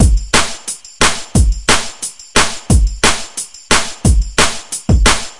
Drums with Shuffle
178BPM DnB beat.